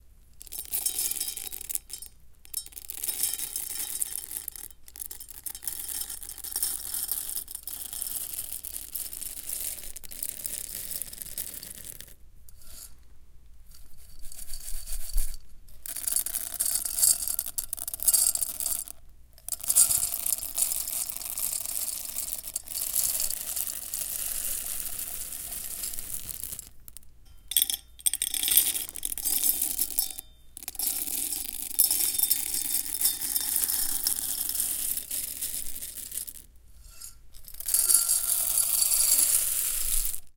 Miked at 3-4" distance.
Seeds poured into metal and glass receptacles.